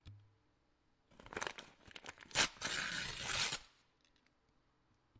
paper tear
Paper being torn slowly
OWI, ripping, paper